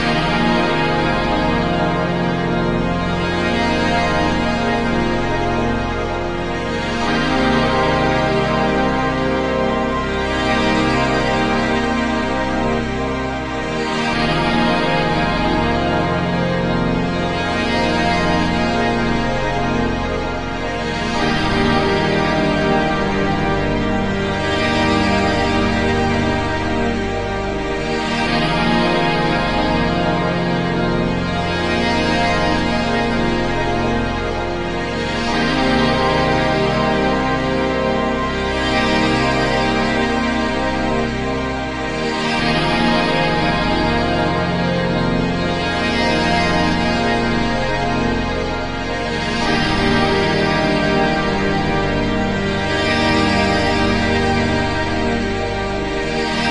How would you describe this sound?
drone triumphant 67-94
A little drone loop I made a while back for a track I lost momentum on. It's supposed to feel triumphant or melancholic or something.
drone, loop, synth, ambient, pad, swells, reverb, drone-loop